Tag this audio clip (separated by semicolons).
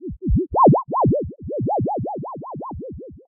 8bit; chippy; chiptone; game; lo-fi; retro; vgm